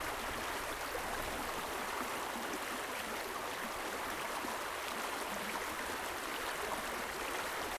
River, running water recorded using a Zoom H2N and X/Y pickup pattern.